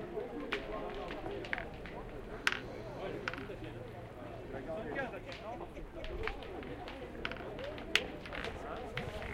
The sound of competitive boules during the French National Championships 2007. Includes the sounds of boules hitting each other and the backboard.